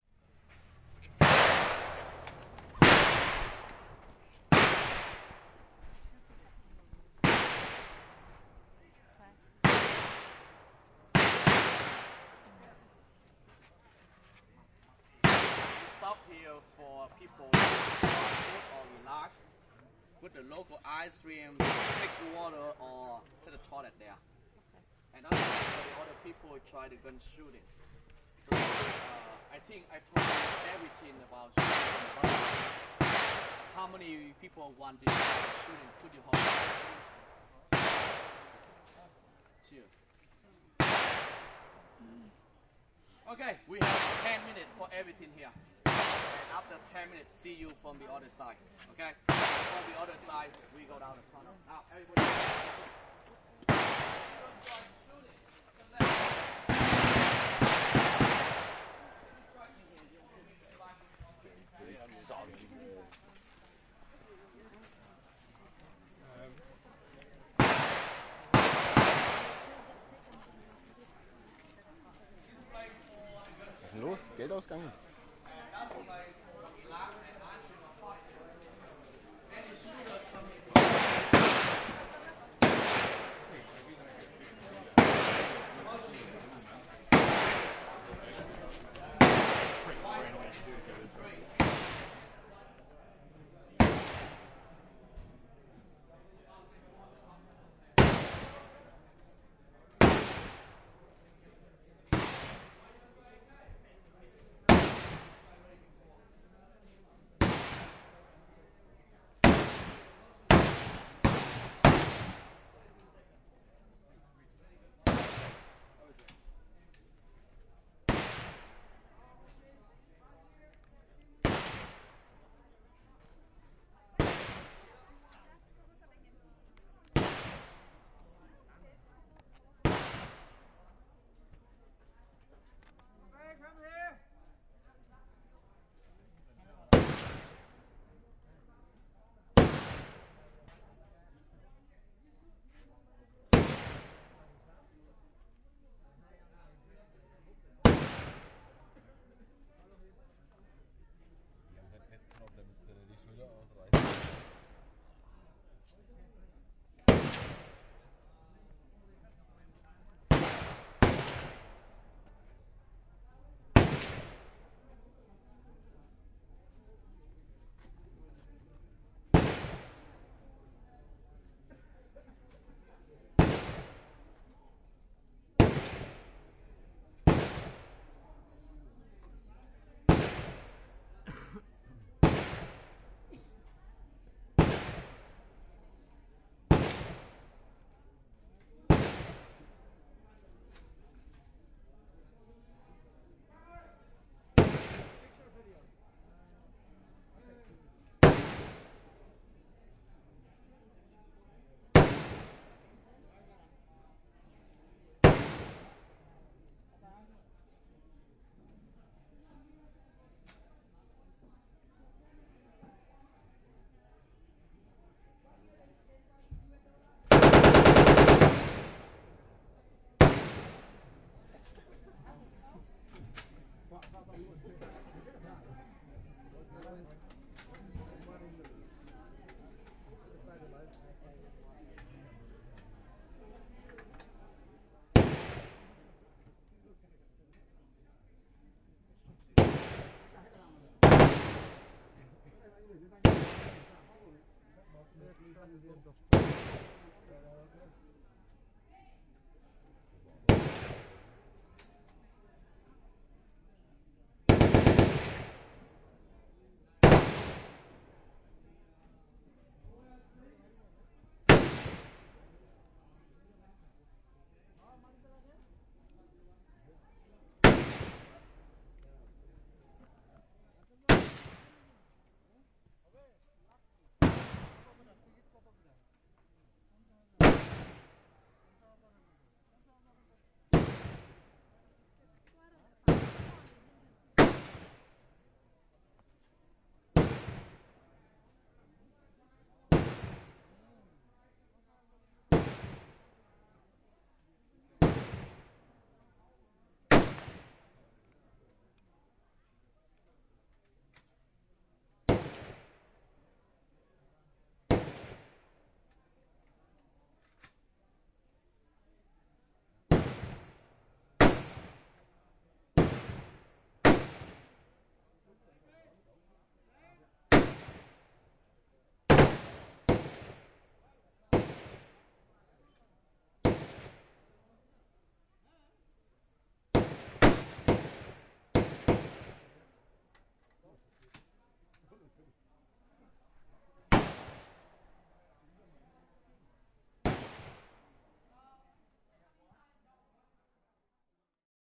SEA 12 Vietnam, Cu Chi Tunnels Shooting Range (Original)
Recording of the tourist shooting range at the Cu Chi Tunnels, former hideout and supply system of the Vietcong Guerilla. Located north of Ho Chi Minh City / Vietnam.
Sounds of real rounds of AK47, M16, M60 and some other guns I can't specify.
Fun fact: one bullet is about 1.65$ (less for the small guns, more for the bigger ones), so in this recording, approximately 230$ were blown into the air ;-)
Date / Time: 2017, Jan. 09 / 12h43m
shooting-range, vietnam